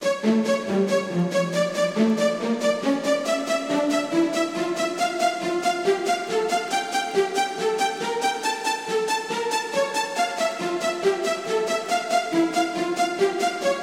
This is a string sample I made with the vst DSK strings.